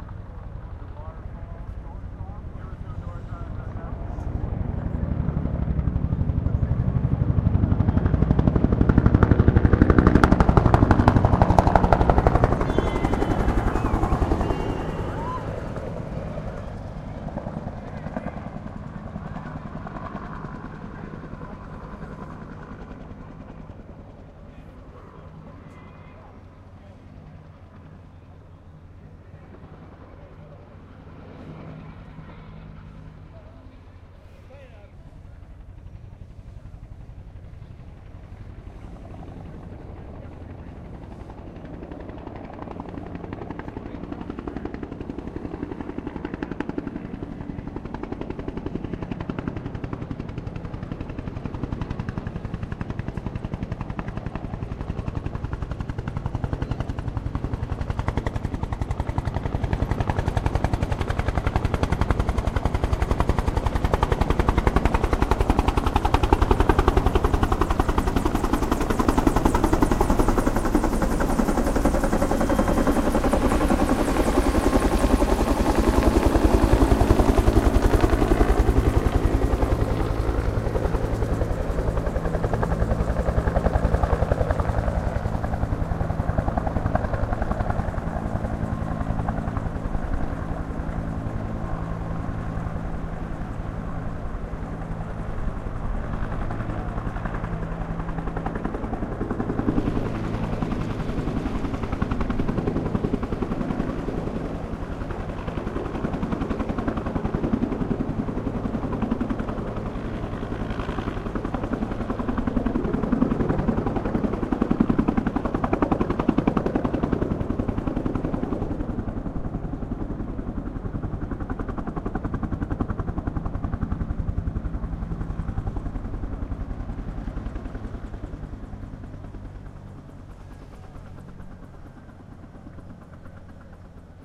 UH-1 helicopter does one flyby then approaches and flies directly overhead. It kicks up some sand as it goes over and then lands on a ship, about 100 meters away. Some sound is reflected from a nearby warehouse and the deck of the ship.
Video of the landing, taken from the ship:
I recorded from the parking lot.
Recorded in 2012 using a sony pcm d50 with built in mics.
huey, aircraft, machine